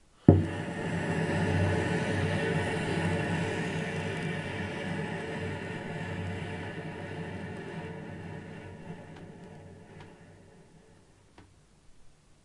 cello played with the bow on the bridge and with muted strings (by the left hand) / variations in bow pressure and partials of the strings (sul ponticello) / recorded at very close distance with Zoom H4N build in microphones